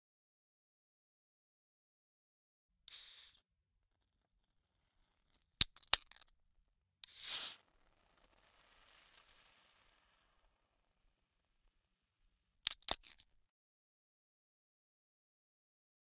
Opening Can
Recorded two can / tins with beer
soda, coke, beverage, can, drink, opening, tin